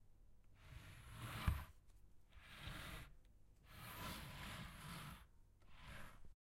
pulling a small couch, at certain intensities and speeds